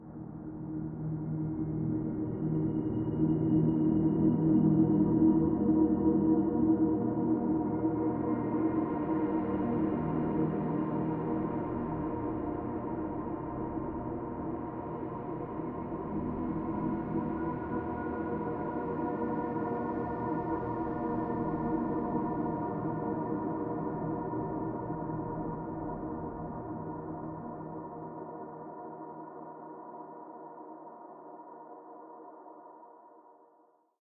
Mysterious Ambience Drone
Ambience, ambient, anxious, atmosphere, aura, background, drama, enigmatic, Mysterious, mystic, Room, sphinxlike, terrifying, thrill